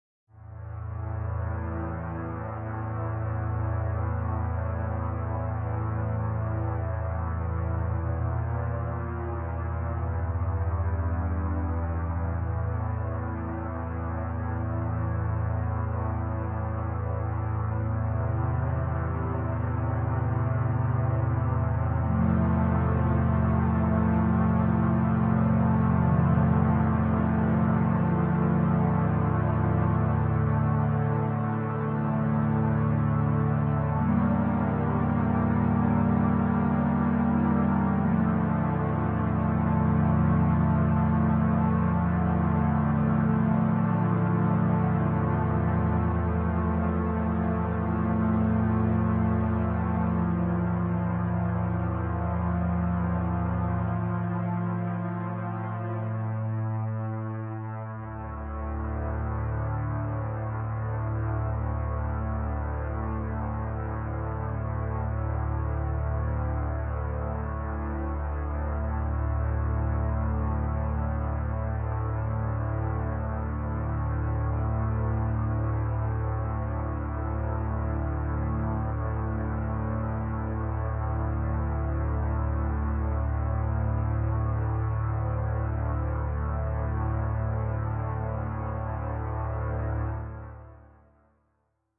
was that really you
Ambient pad for a musical soundscape for a production of Antigone
ambient, deep, dissonant, drone, musical, pad, soundscape